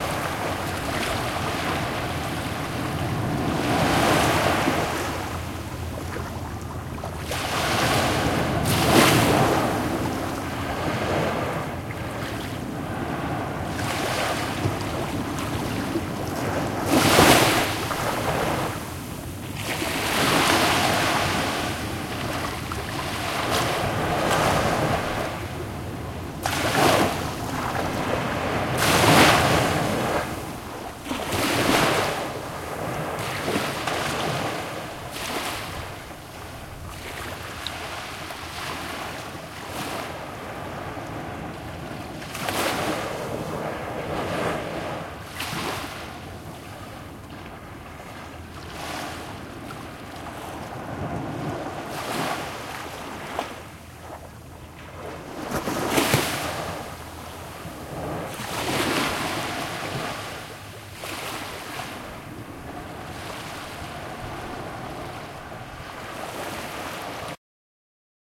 Waves Lapping Shore.
This is the sound of waves lapping the shore. Recorded in stereo with a Sony PCM-D100.
ambience, ambient, beach, breaking-waves, coast, coastal, crashing, field-recording, lapping, nature, ocean, relaxing, sand, sea, sea-shore, seashore, seaside, shore, shoreline, Sony-PCM-D100, splash, splashes, splashing, stereo, surf, tide, water, wave, waves